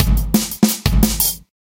eardigi drums 38
This drum loop is part of a mini pack of acoustic dnb drums
bass, dnb, drumandbass, drum-loop, drums, drumstep, kick, percussion, percussive, snare